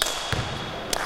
aplause-bot
Aplauso y un bote
aplauso, basket, field-recording